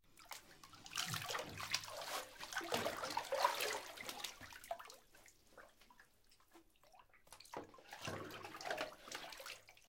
Bath - Body movements
Someone taking a bath - interior recording - Mono.
Recorded in 2003
Tascam DAT DA-P1 recorder + Senheiser MKH40 Microphone.
movements, water, foley, bathroom, bath